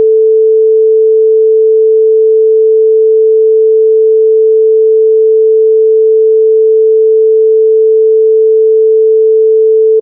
Sine Tone 440Hz -3dBFS 10 seconds
Sine, Tone, Wave